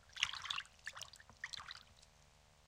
Shaking water with the hand